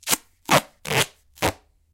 Quick duct tape unrolling or peeling.